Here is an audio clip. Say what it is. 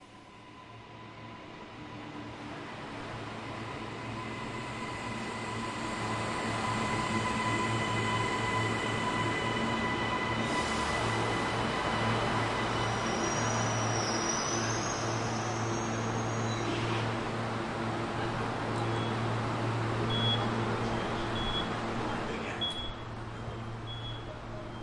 Ambience at a train station